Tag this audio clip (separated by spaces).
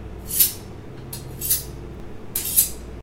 sharping knife sharp